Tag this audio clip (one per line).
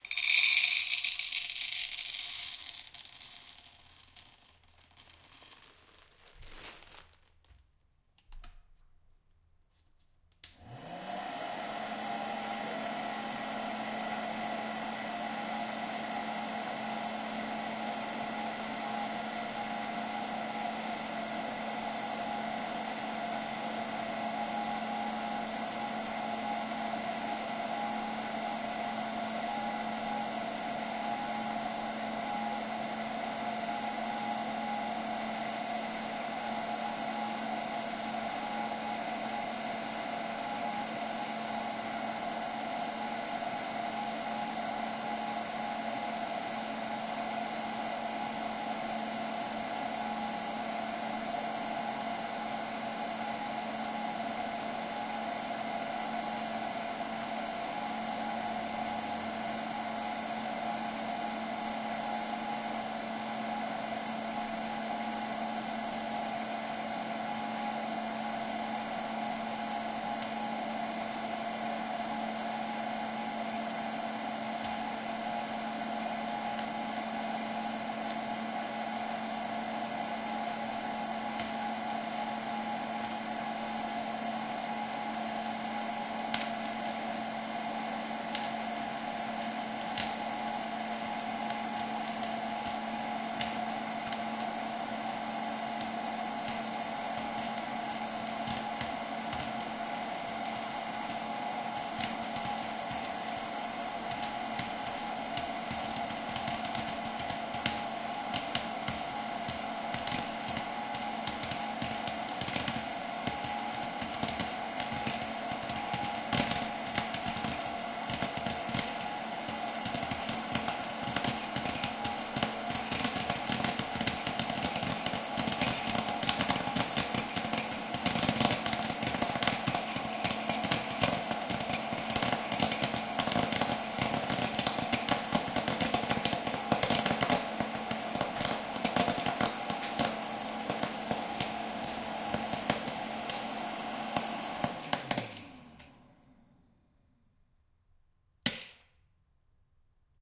pour
crackle
cornelius
switch
food
cook
snap
fan
pop-corn